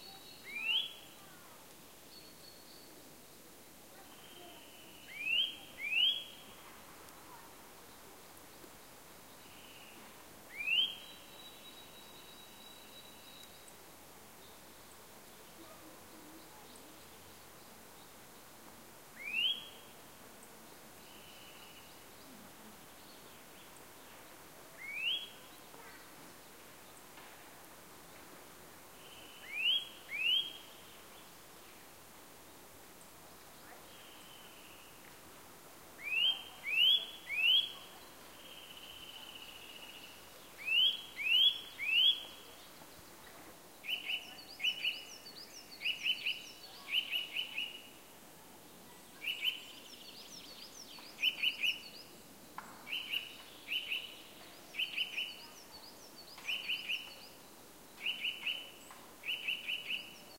Eurasian Nuthatch calling in two different ways in a forest in the German Black Forest region at springtime. Zoom H4n